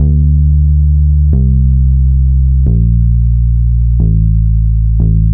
hip hop bass line
A bass line used quite often in rap/hip hop songs.
Notes are E, C, Bb, A.
I used the "Da Streetz Bass" Subtractor patch from Propellerheads Reason 3.0.
90-bpm, a, bass, bass-line, bb, c, e, hip-hop, loop, rap